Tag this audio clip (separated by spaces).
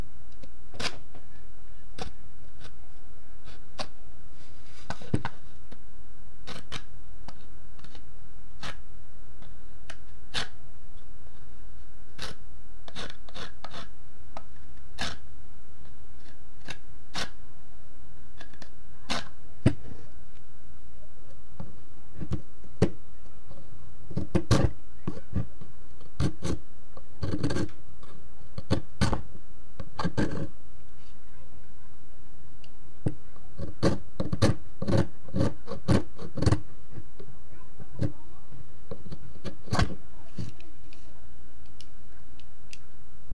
wood scrape carve whittle knife